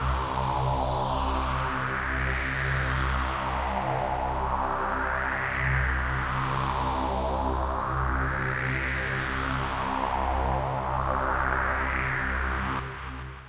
Riser 5 Flicker
Basic opening pad for a song. 150 bpm
synth, distortion